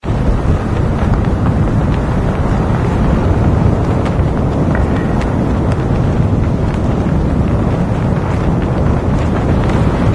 sounds from a skydive